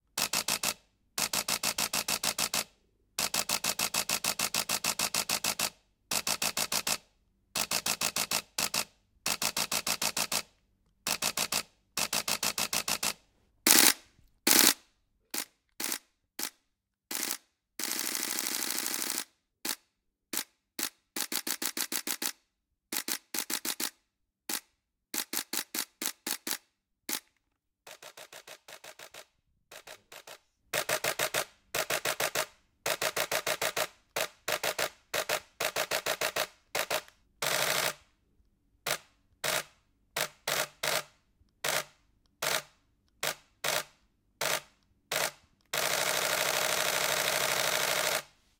canon camera various clicks
various versions of taking photos on a modern canon camera, i think it was the DXMKII or sth?
canon, click, photography